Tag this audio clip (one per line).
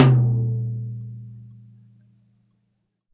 1-shot
drum
multisample
tom
velocity